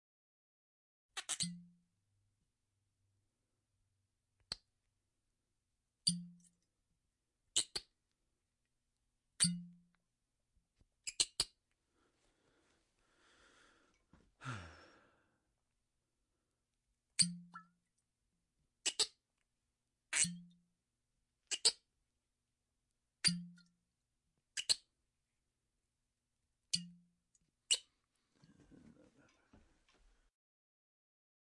Sound Bottle Cork to open